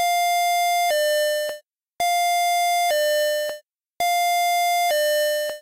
Electric tone entry chime
This is an electric chime that you might hear when walking into a store.
chime, electric-chime, electronic-chime, entry-chime